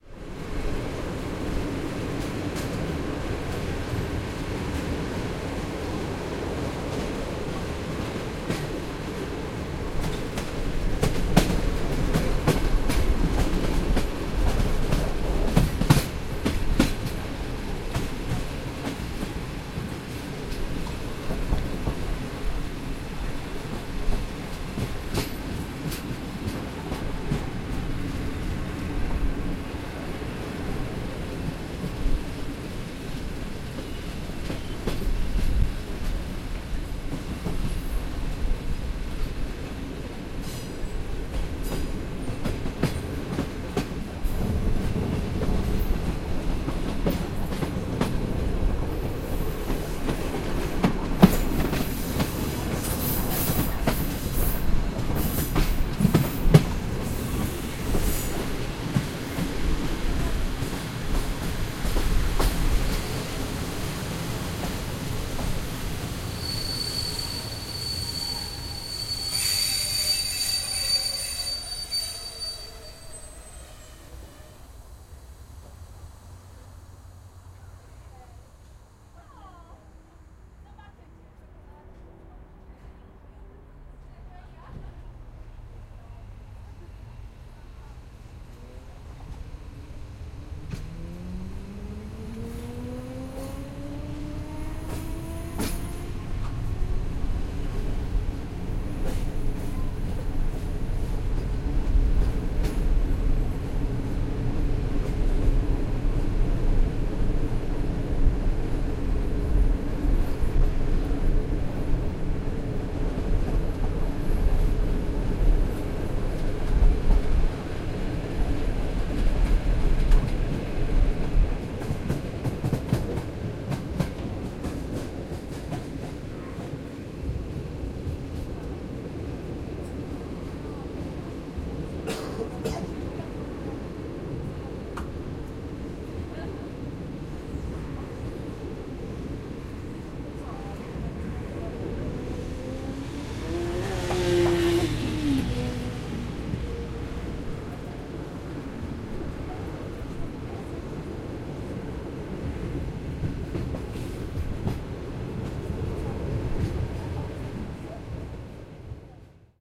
A recording of a noise of a polish train running, slowing down with the specific metalic sqeaky sound, stopping, accelarating and then running slowly. At the end of the clip there is a motorcycle passing by no the road parallel to the train tracks.